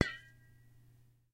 air can 02
This is a mallet hitting an air duster can.